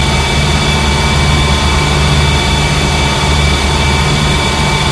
Created using spectral freezing max patch. Some may have pops and clicks or audible looping but shouldn't be hard to fix.
Atmospheric, Background, Everlasting, Freeze, Perpetual, Sound-Effect, Soundscape, Still